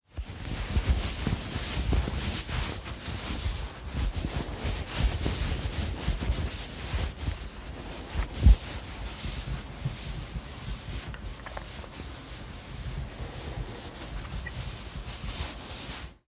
Coat Rustle
clothes rustle,
Recorder: Mixpre 6
Microphones: Oktavia
clothing, material, textile, clothes, texture